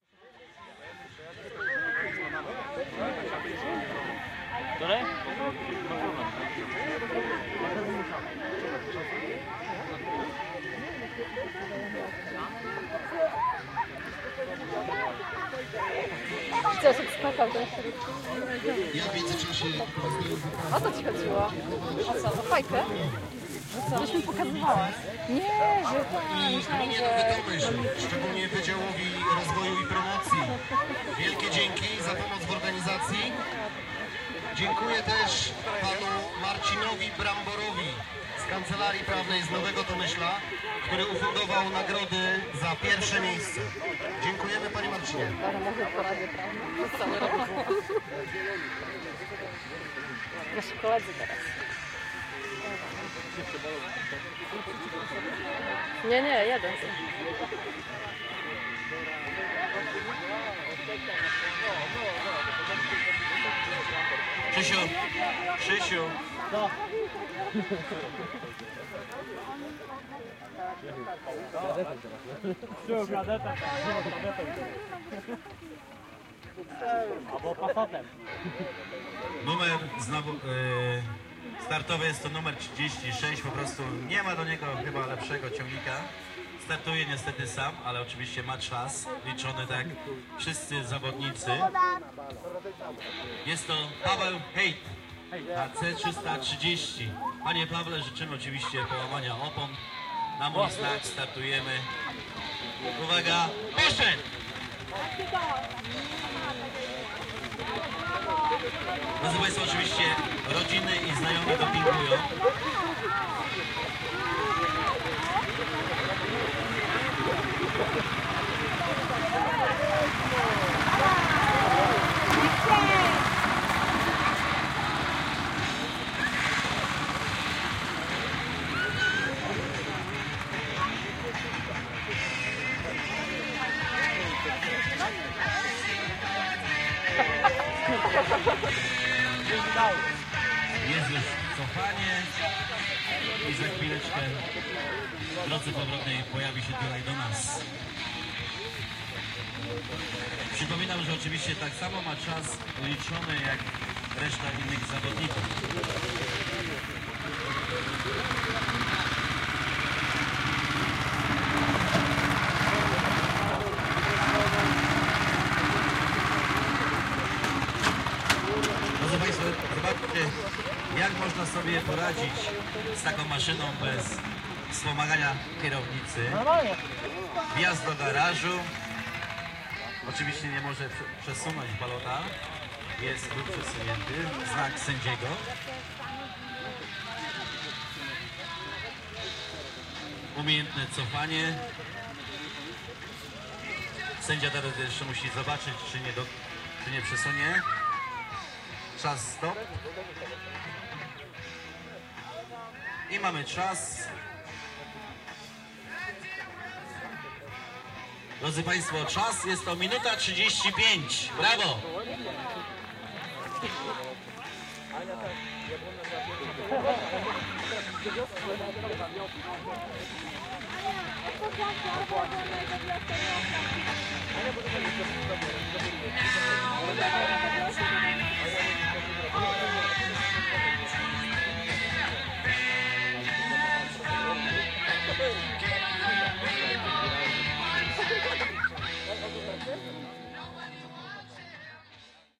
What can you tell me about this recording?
the First Majster Trak - race of tractors in Wola Jablonska village (Polad). The event was organized by Pokochaj Wieś Association.
Recorder: marantz pmd661 mkii + shure vp88